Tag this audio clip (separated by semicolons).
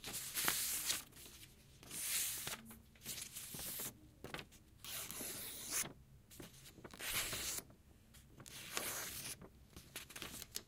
household; scrape; paper